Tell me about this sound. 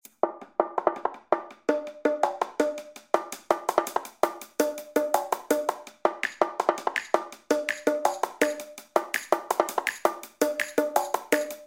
Drum n Bass Hi-Hat Conga Loop
Hi-hats and Conga's looping for your drum n bass. Made in Reason.
amen, bongo, congas, drum, drum-n-bass, jungle